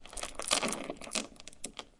door chain put on lock wood metal rattle 2
sliding on a metal security chain on a wooden door
chain, Door, lock, metal, rattle, security, steel